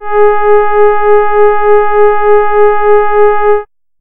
Warm Horn Gs4
An analog synth horn with a warm, friendly feel to it. This is the note G sharp in the 4th octave. (Created with AudioSauna.)
brass, warm